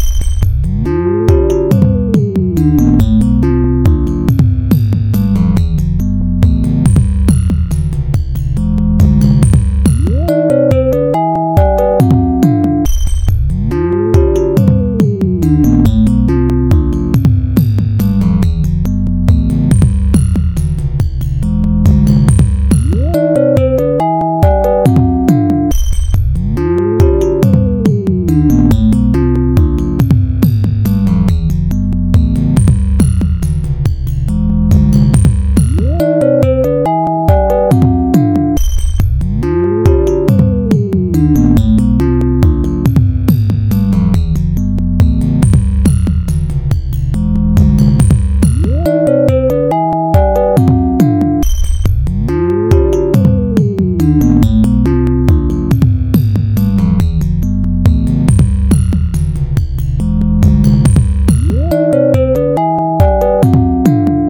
Kicker, Triple Oscillator and Vibed with automation in LMMS
aaunchhu, long-loop, LMMS